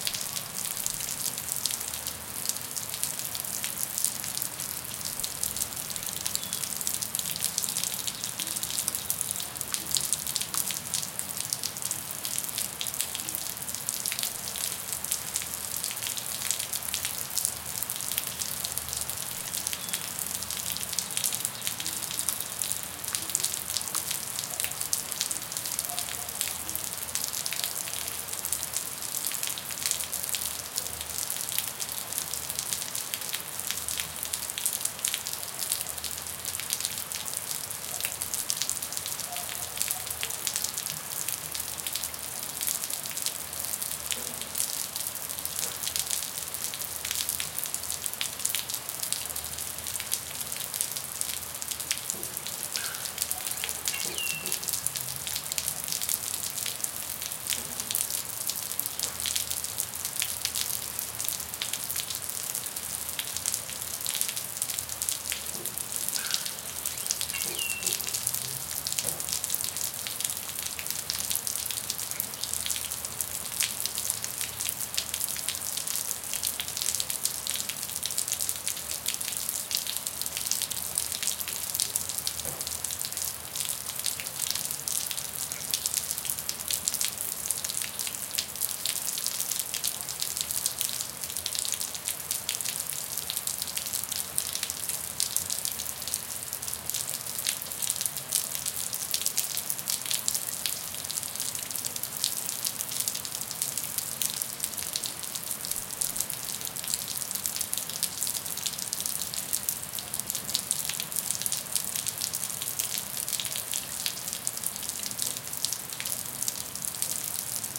Rain, water stream
Field recording of the rain outside my house with a Zoom H4n Pro. I equalized cutting the low frequencies.
In the foreground is a water stream hitting a concrete sidewalk and in the background some birds singing occasionally.
ambience, field-recording, rain, weather